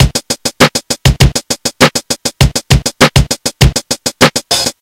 16 beat drum-loop sampled from casio magical light synthesizer
drum, loop, magicalligth, percs